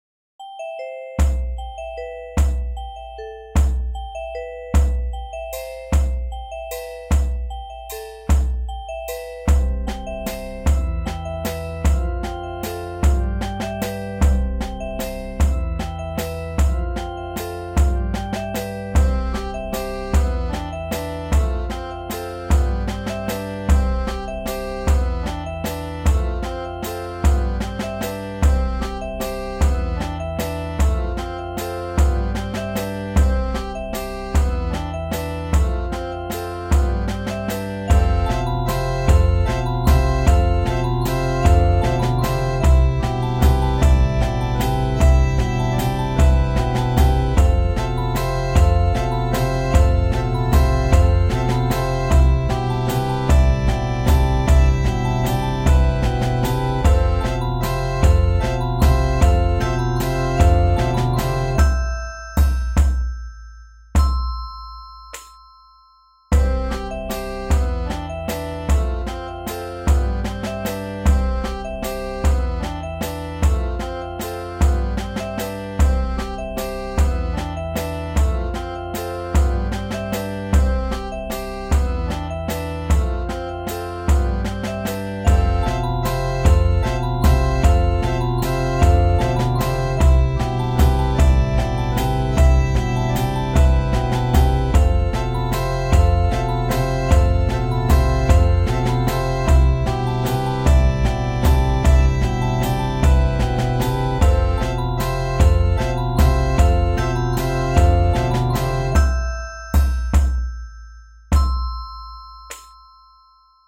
A short jingle made in Studio One with stock instruments. If you get to use it, I'd love to see the end product.
loop, melody, waltz, loopable, music-box, soft, melancholic, tune, game, music, accordion, free, nice, jingle